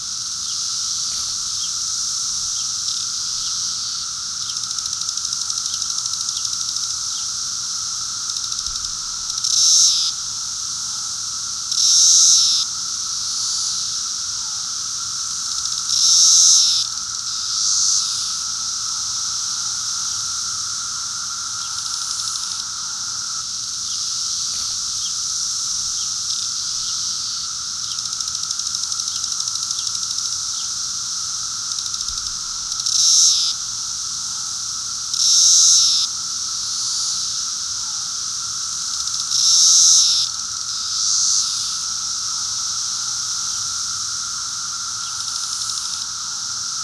Cicadas24bit
A stereo recording of close, mid and far 17 year cicadas in the mid-atlantic US.
bugs, cicadas, locust